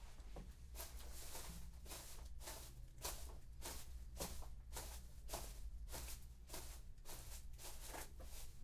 Foley - Steps in Grass